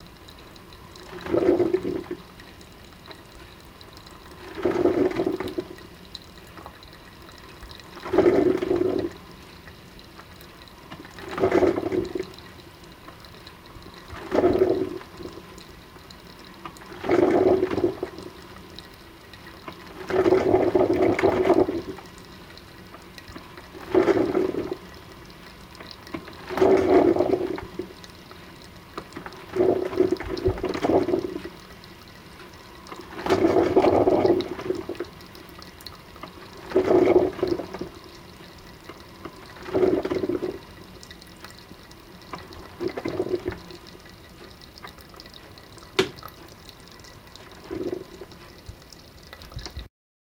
coffemachine brewing - actions
Some Fieldrecordings i did during my holidays in sweden
Its already edited. You only have to cut the samples on your own.
For professional Sounddesign/Foley just hit me up.
brew, cafe, hot, machine, maker, noise, pulse, steam